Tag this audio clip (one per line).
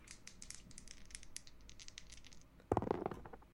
Boardgame
Roll
Dice